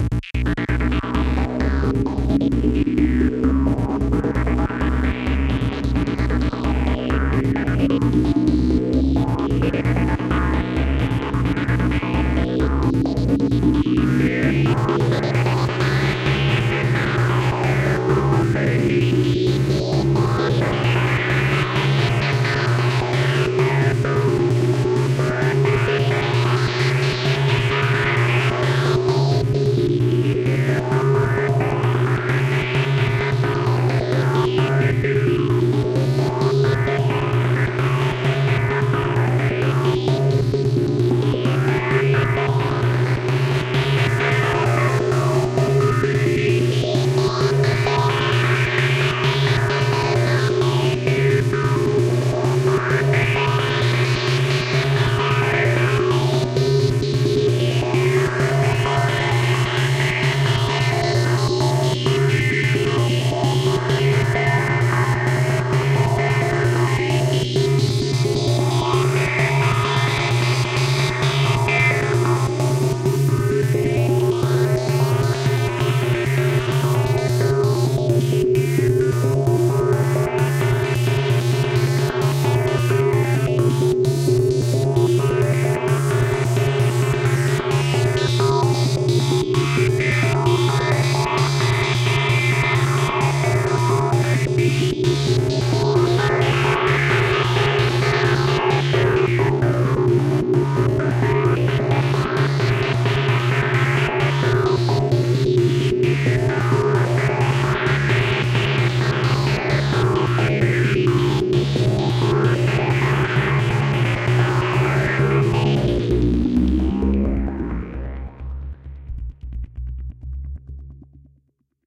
motion delay sound 2
sound crested in ableton with motion delay effect.
ableton, dance, delay, electronic, live, loop, motion, sound, techno